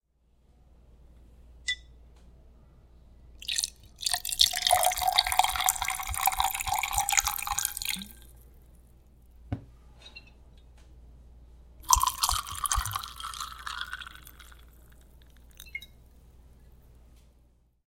Liquid Pouring With Ice
Recording of a liquid being poured into a glass filled with ice. Recorded on my Tascam TM-PC1's.
cubes, drink, glass, ice, liquid, pour, pouring